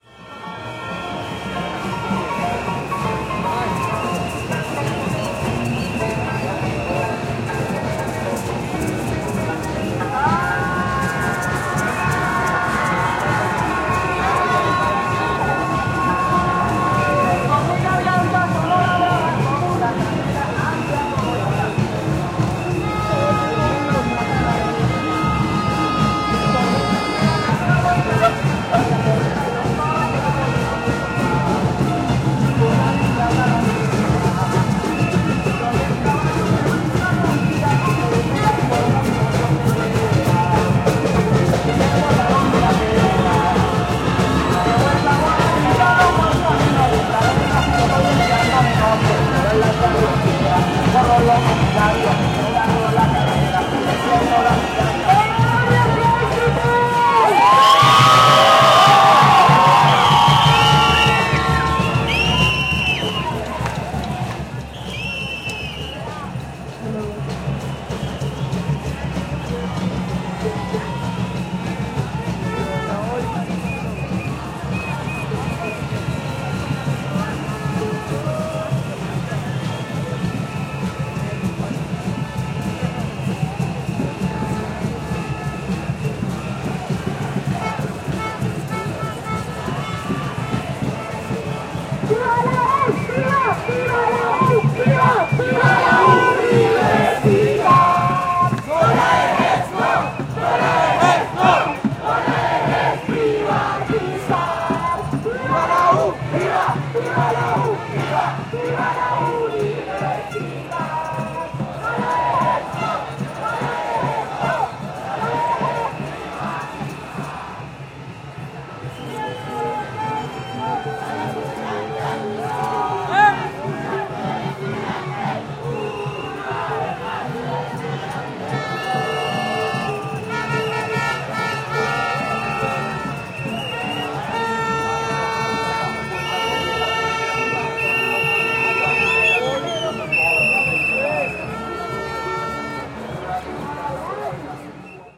Bogota Demonstration
Recorded in Bogota Colombia on a demonstration of students. The demonstration was for free education. Equipment was a Rode NT-4 Stereo mic thru a Rode Boom and a Fostex FR2.
Woloszy, bildung, Tunk, edjucation, Woloszyn-Mike, t, capital, students, bogota, universidad-national, colombia, universit, parolen, demonstration, free-education, national-university, EDU, estudiantes, demo, Mike-Woloszyn, studenten